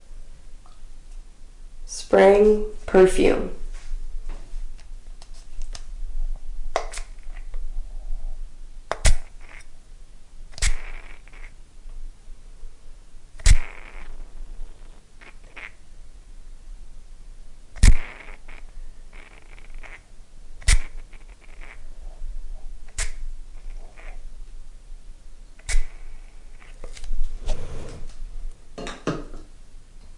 spraying perfume
burst, perfume, smelly, spray, spraying